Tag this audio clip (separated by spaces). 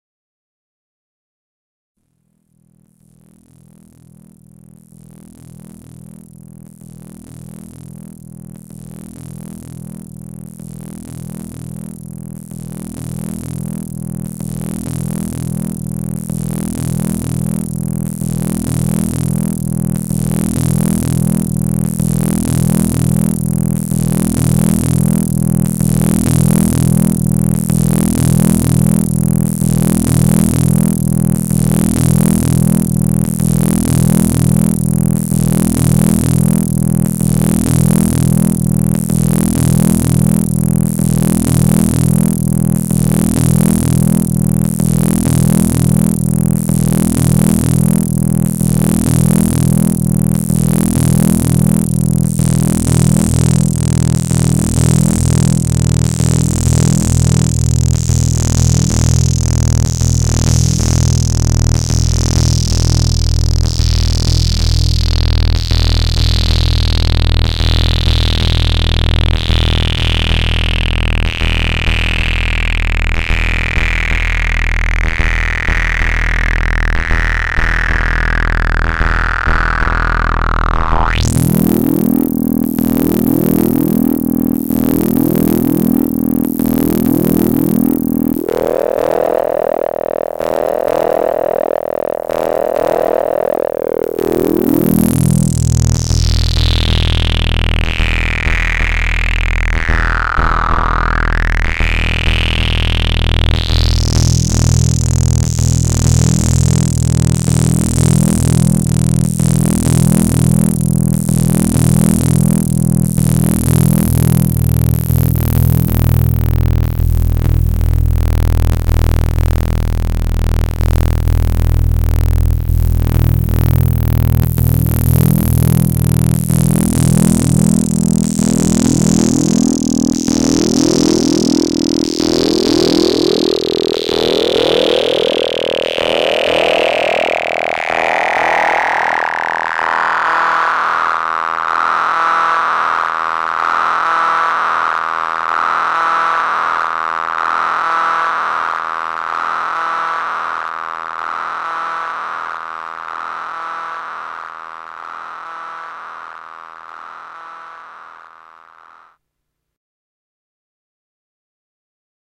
station II synth filter analog bass